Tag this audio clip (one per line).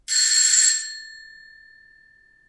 door
doorbell
metallic
ring
ringing
rings